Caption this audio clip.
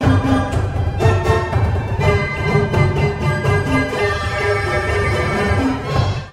Params used to analyze and synthesize the sound:
"name": "naive",
'NS': [8192 * 4],
'wS': [851]